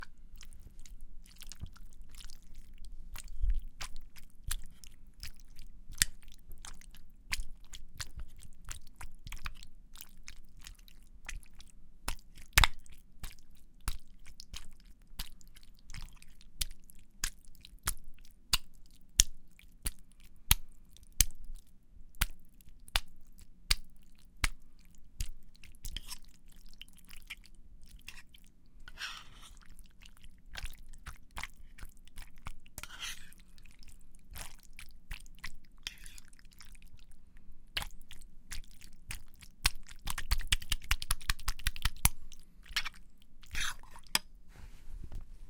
gentle hitting a spoon on a plate of wet food
a, food, gentle, hitting, plate, spoon, wet